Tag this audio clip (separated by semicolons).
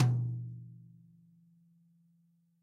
Drums; Whisk; With; Hit